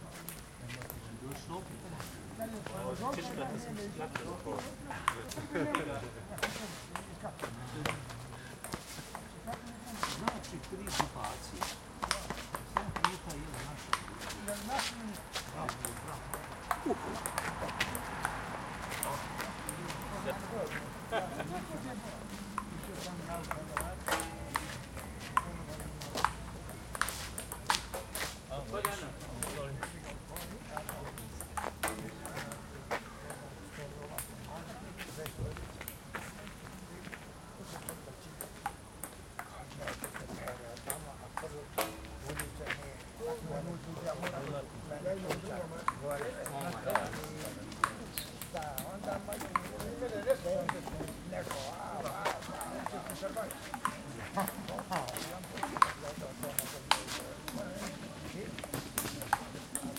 Ping-Pong in the park - Stereo Ambience
two parties playing ping-pong in a little park, summer in the city
ambiance, ambience, ambient, atmo, atmos, atmosphere, background, background-sound, field-recording, stereo